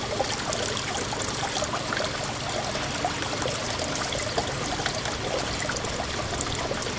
Fountain dripping
Sound of a fountain in a park.